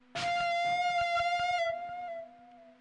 Dark robotic sample 019
Sample taken from Volca FM->Guitar Amp.
artificial, dark, fm, robotic, sample, volca